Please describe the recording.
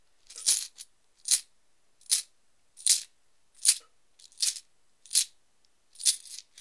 Holding about half a dozen coins in my hand, and tossing them in the air slightly.
coin, coins